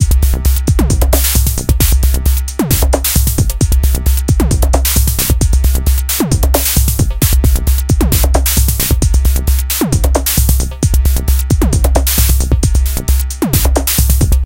133 bpm ATTACK LOOP 04 electrified analog kit variation 07 mastered 16 bit
This is loop 7 in a series of 16 variations. The style is pure electro.
The pitch of the melodic sounds is C. Created with the Waldorf Attack VSTi within Cubase SX. I used the Analog kit 2 preset to create this 133 bpm loop. It lasts 8 measures in 4/4. Mastered using Elemental and TC plugins within Wavelab.
133bpm, drumloop, electro, loop